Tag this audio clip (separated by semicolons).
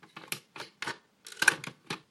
close-lid; lid; open-lid; radio; stereo